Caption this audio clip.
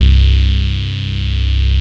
SYNTH BASS 0206
SYNTH SAW BASS
synth, bass